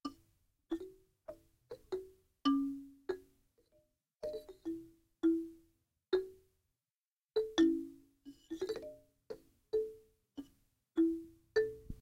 african sound 2
Some notes from an african instrument